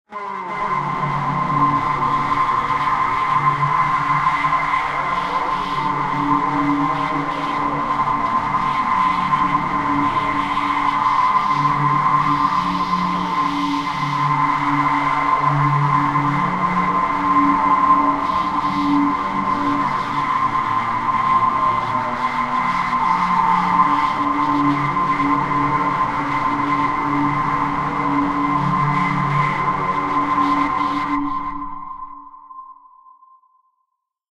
a harsh tone texture